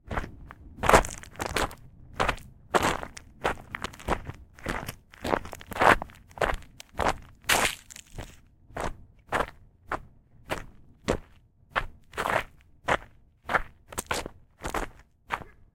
Me walking on gravel. Recorded with my Walkman Mp3 Player/Recorder. Simulated stereo, digitally enhanced.